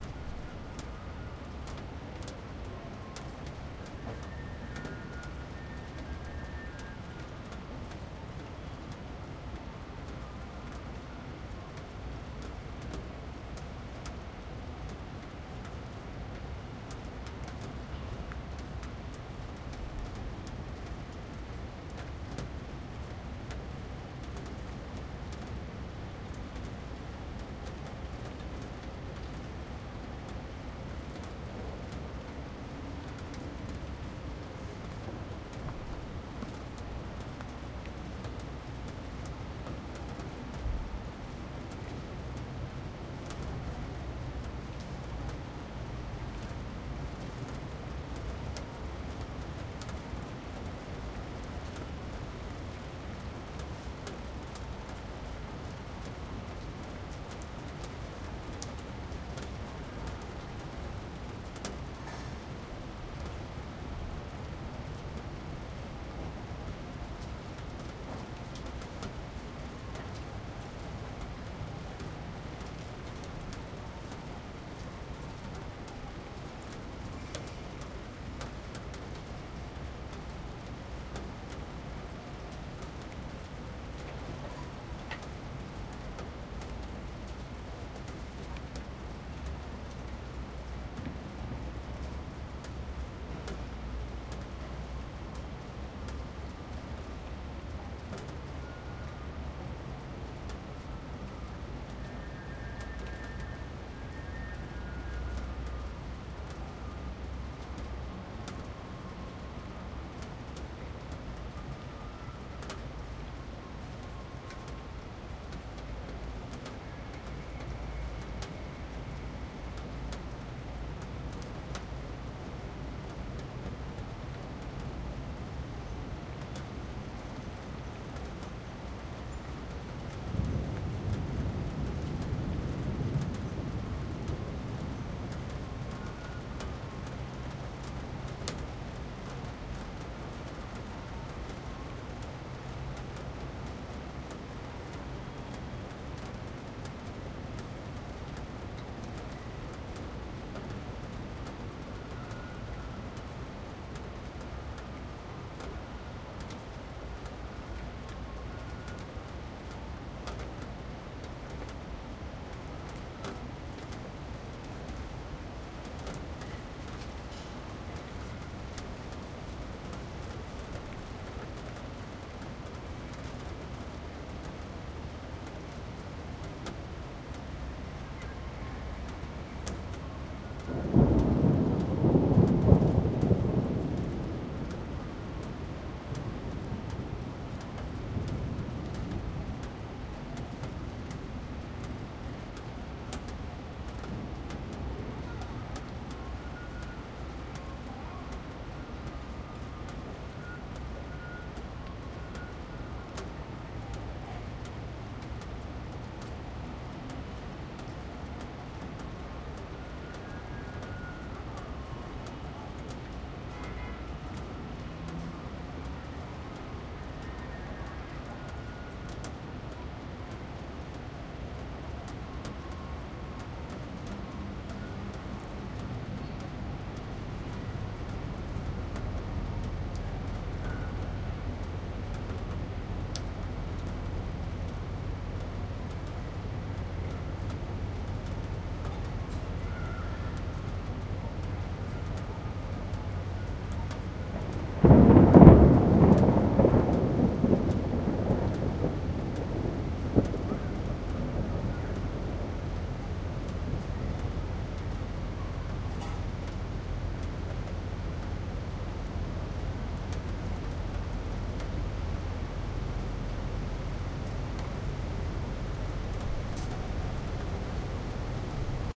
Rain and thunder in Beijing
Heavy rain and thunder, dripping sounds on AC-unit. Someone playing a flute in one of the other buildings in the distance.
Beijing, rain